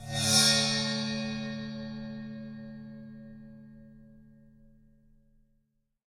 Bowed Mini China 04
Cymbal recorded with Rode NT 5 Mics in the Studio. Editing with REAPER.